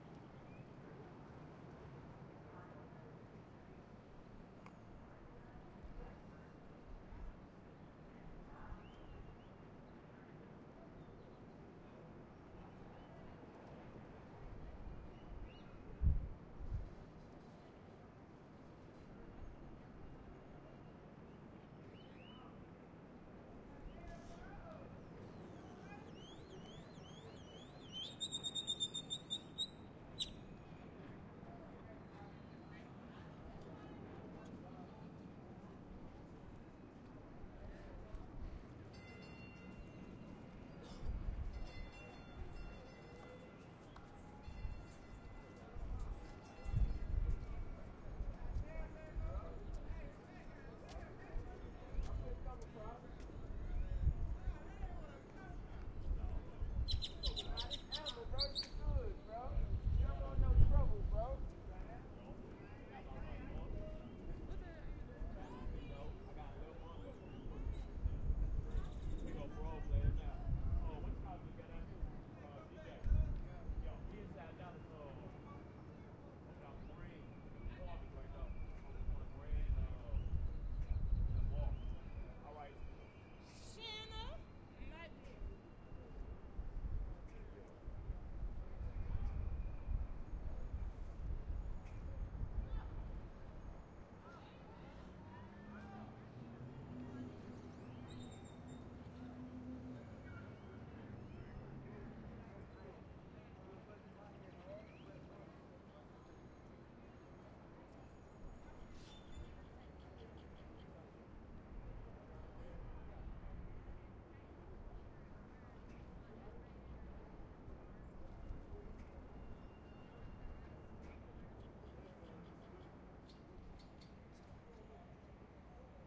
Part of the Dallas/Toulon Soundscape Exchange Project
Date: 4-5-2011
Location: Dallas, West End Station
Temporal Density: 3
Polyphonic Density: 3
Busyness: 4
Chaos: 2
people-talking, bells